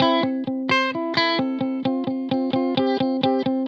guitar recording for training melodic loop in sample base music
electric, guitar, loop